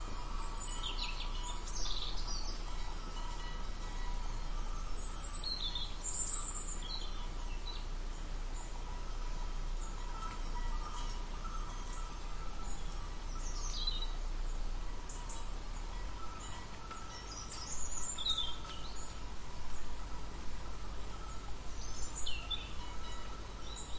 The file was recorded at the evening on an November day in Ovodda.
Sardinia: the Original SoundScape is a project that aims to collect and diffusion of the best natural soundscapes of the island. An informative digital path of the sounds that make the music of the Sardinian soundscape. Path that intends to put the knowledge of the area through the ear. It is recommended to listen to the files with headphones, in order to preserve the integrity of the file.